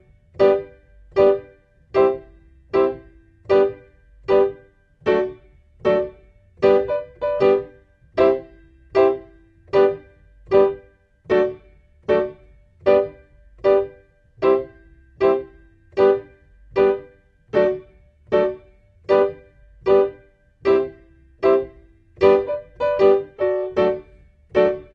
zulu 77 G2 PIANO 3
Roots rasta reggae
rasta, reggae, Roots